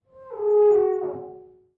mirror resonance 10
Recording the procedure of cleaning a mirror inside an ordinary bathroom.
The recording took place inside a typical bathroom in Ilmenau, Germany.
Recording Technique : M/S, placed 2 meters away from the mirror. In addition to this, a towel was placed in front of the microphone. Finally an elevation of more or less 30 degrees was used.
mirror, resonance, bathroom, glass, cleaning